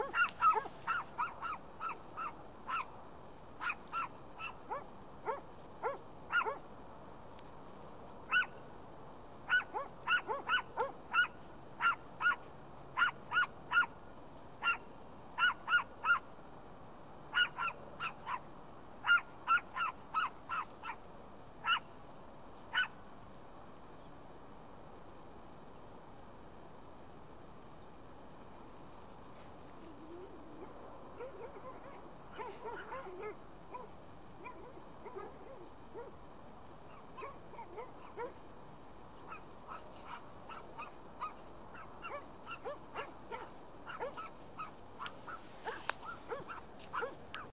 dogs barking
Walking my dogs in a big field with dogs from the area barking.
bark, barking, dogs, field, field-recording, outside, walk